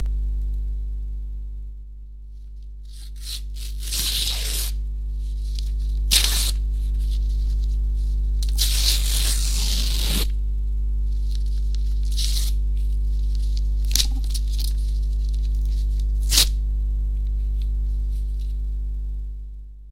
old navy glory